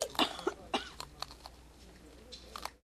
A trip to the movies recorded with DS-40 and edited with Wavosaur. Audience ambiance before the movie.
ambience, cough, coughing, crunch, eating, female, field-recording, theater